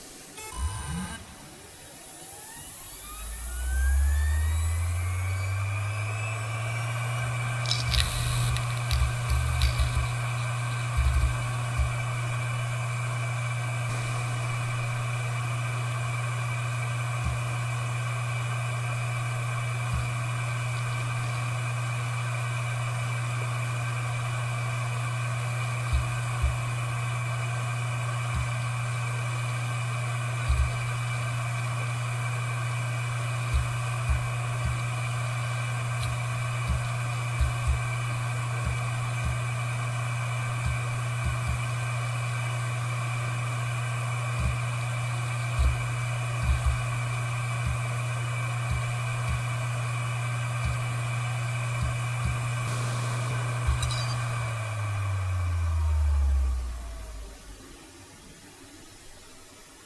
Seagate Barracuda 7200.14 - Slow Spinup - FDB
A Seagate hard drive manufactured in 2012 close up; spin up, writing, spin down.
This drive has 3 platters.
(st3000dm001)